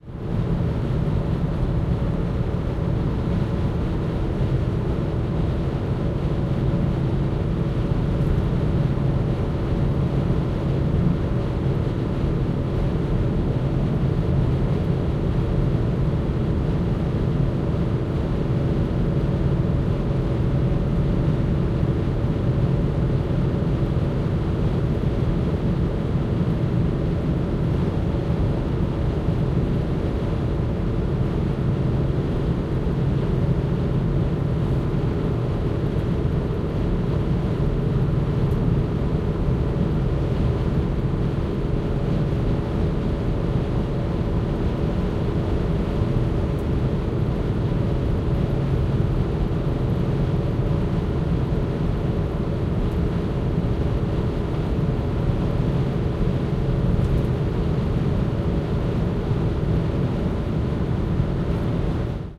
Front recording of surround room tone recording.